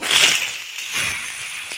Laughing through your schnauzer.